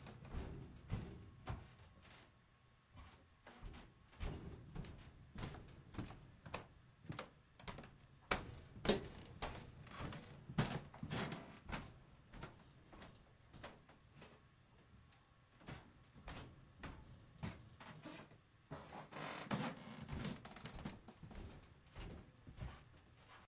Noisy Neighbors Real
noisy upstairs neighbors
upstars, foot, footsteps, stomp, creaking, pounding, noisy, march, neighbors, steps